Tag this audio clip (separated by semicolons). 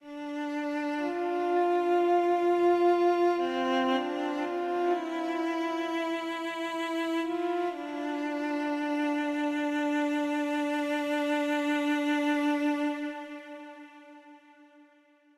meditation; Cello; Heaven; spirit